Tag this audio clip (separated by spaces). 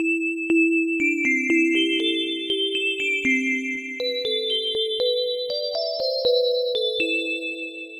cute ambient bells melody sound key chord tune